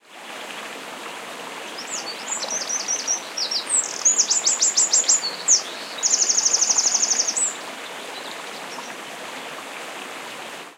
Very strong bird trill, with brook babbling in background. Audiotechnica BP4025 inside blimp, Shure FP24 preamp, PCM-M10 recorder. Recorded near La Macera (Valencia de Alcantara, Caceres, Spain)